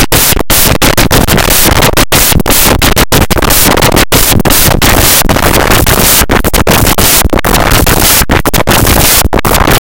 Weird Resonance Turntable-ish Breakbeat Thing
cool, break-beat, whoa, turntable, ok-wut, weird